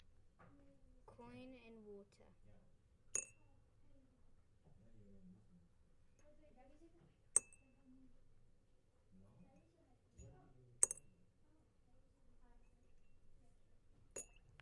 sonicsnaps GemsEtoy davidcoininwater
coin in water
sonicsnaps, TCR, Etoy